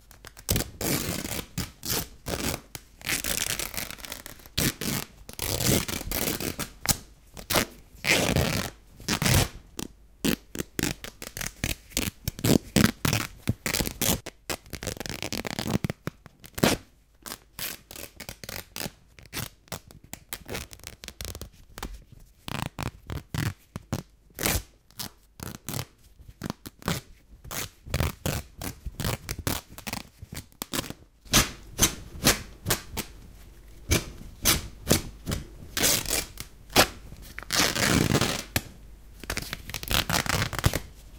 Tearing T-Shirt Cloth
My hands vs a shirt.
Result of this recording session:
Recorded with Zoom H2. Edited with Audacity.
cloth, clothes, clothing, destroying, destruction, junk, material, recycling, synthetic, tear, tearing, trash